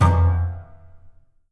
Percussive piano sounds recorded inside a piano - the 'type' of sound is described by the file name.
hits, percussion, Piano, stabs